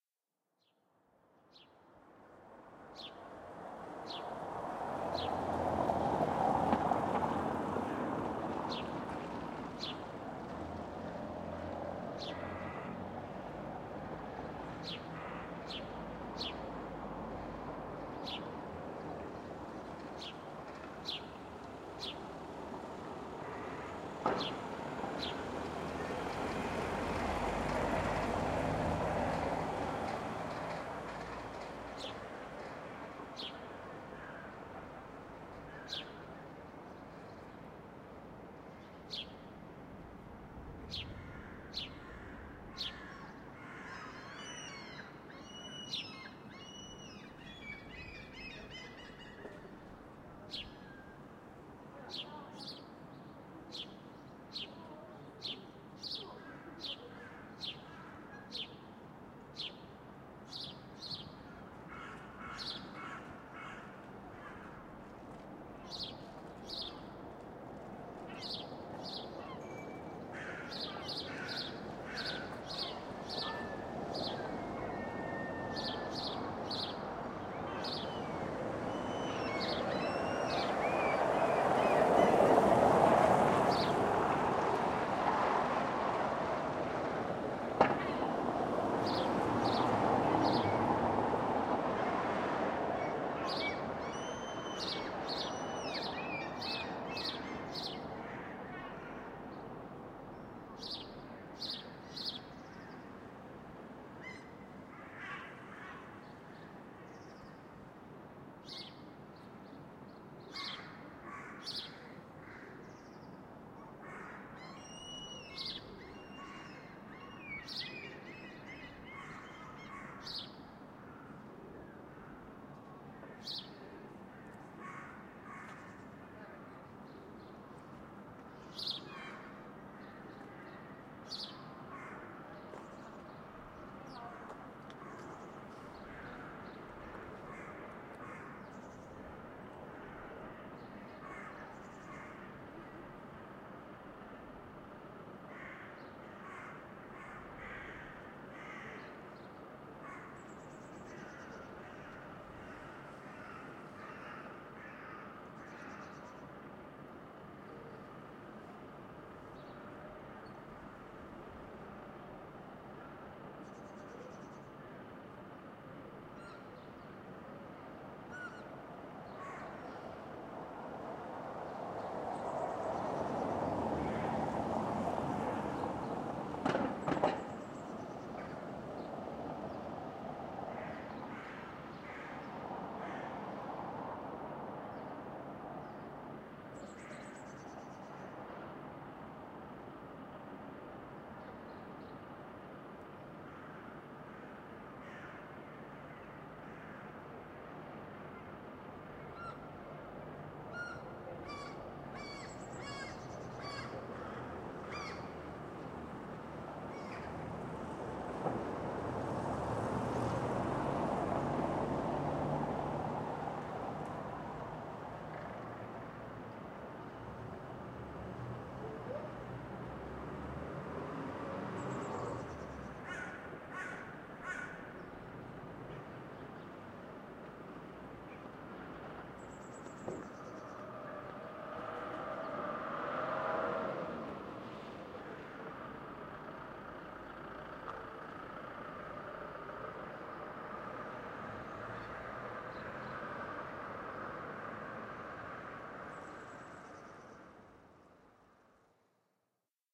early morning in Bergen, Norway.
ambience, ambient, atmosphere, bergen, birds, city, cityscape, field-recording, morgen, morning, noise, norge, norway, soundscape, traffic